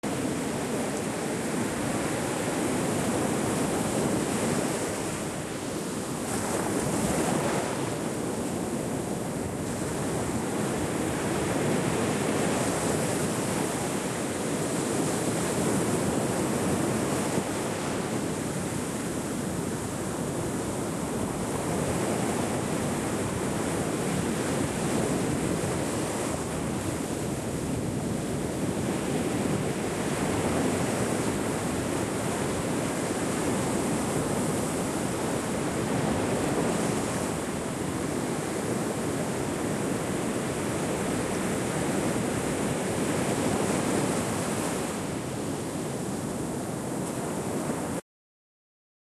The sounds of ocean waves right before sunrise. This wave file was recorded with the zoom field recorder. Lot's more to come.